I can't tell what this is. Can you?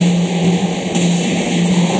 This is loop 27 in a series of 40 loops that belong together. They all have a deep dubspace feel at 60 bpm and belong to the "Convoloops pack 01 - back to back dubspace 60 bpm" sample pack. They all have the same name: "convoluted back to back loop 60 bpm"
with a number and letter suffix (1a till 5h). Each group with the same
number but with different letters are based on the same sounds and
feel. The most rhythmic ones are these with suffix a till d and these
with e till h are more effects. They were created using the microtonik VSTi.
I took the back to back preset and convoluted it with some variations
of itself. After this process I added some more convolution with
another SIR, a resonator effect from MHC, and some more character with (you never guess it) the excellent Character plugin from my TC powercore firewire. All this was done within Cubase SX.
After that I mastered these loops within Wavelab using several plugins:
fades, equalising, multiband compressing, limiting & dither.